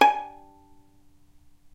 violin pizz non vib G#4
violin pizzicato "non vibrato"